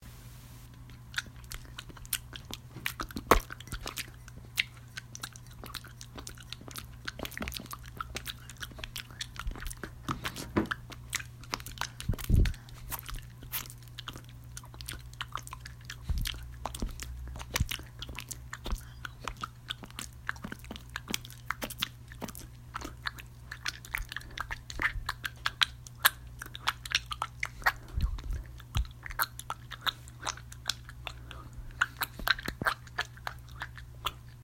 licking a lollipop